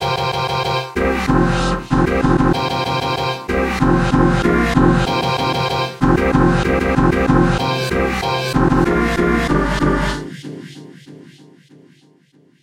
hip hop17 95PBM
background, beat, broadcast, chord, club, dance, dancing, disco, drop, hip-hop, instrumental, interlude, intro, jingle, loop, mix, move, music, part, pattern, pbm, podcast, radio, rap, sample, sound, stabs, stereo, trailer